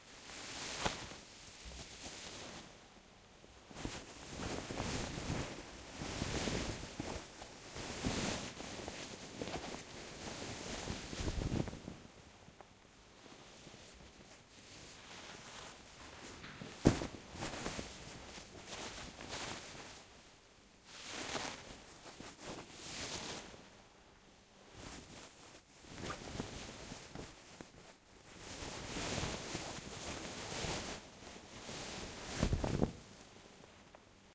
A person with a heavy skirt moves and turns, making the skirt rustle. It can be used for all kinds of clothes, curtains, fabric Foley.